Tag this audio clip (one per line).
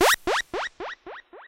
analog
beep
delay
electronic
fx
korg
monotron
space
synth
synthesizer